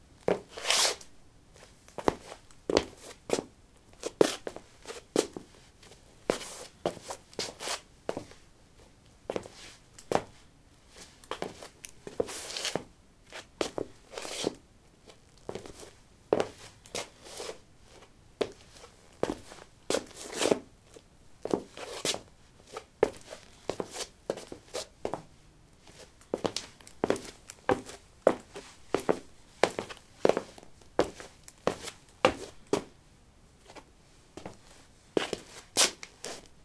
lino 07b leathersandals shuffle threshold
Shuffling on linoleum: leather sandals. Recorded with a ZOOM H2 in a basement of a house, normalized with Audacity.
footstep, footsteps, step, steps, walk, walking